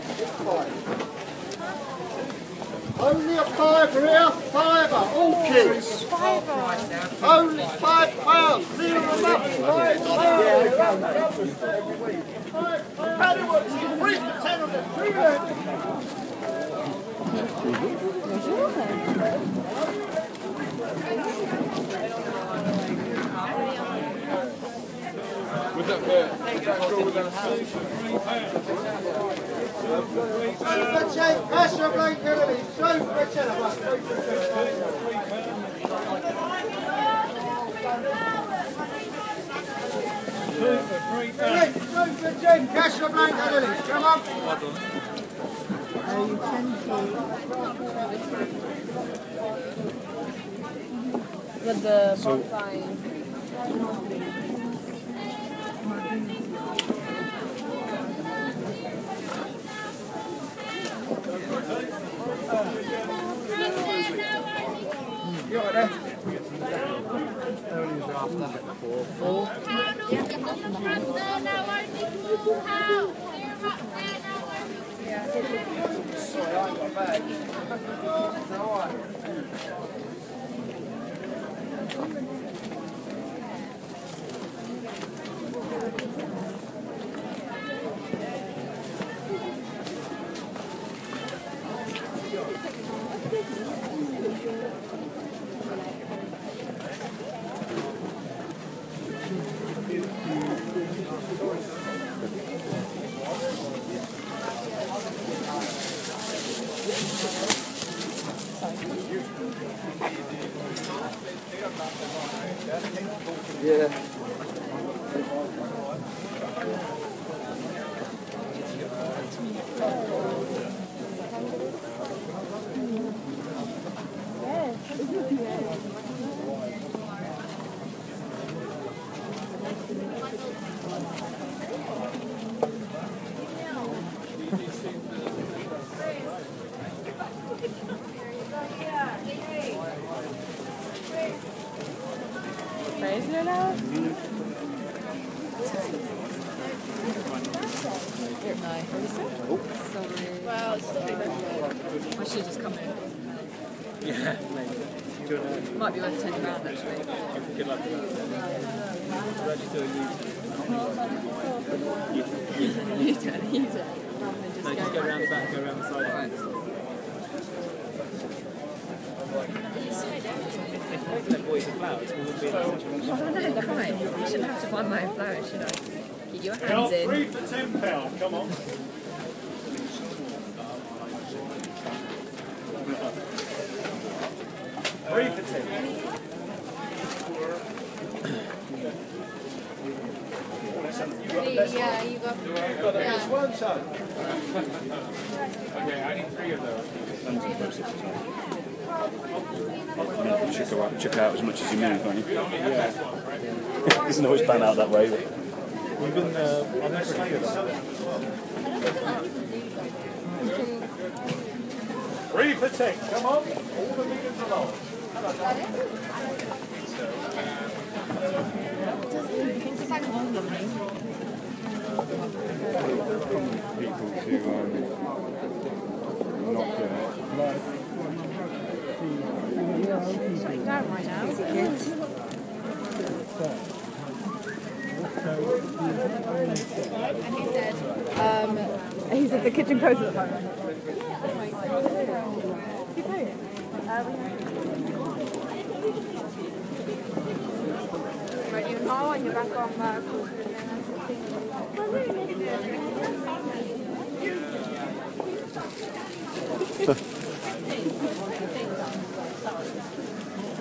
A short recording of the famous Columbia Road flower market in London on a Sunday afternoon. Flower sellers shout out the prices of their wares. Tourists and locals chatter in a variety of languages.
field-recording, flower, London, market, people, stall-holders